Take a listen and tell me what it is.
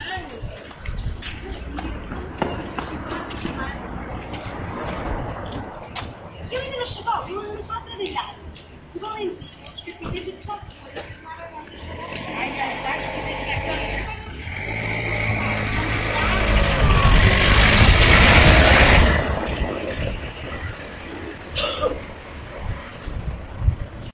walking arround caxinas
caxinas, portugal, sounds, street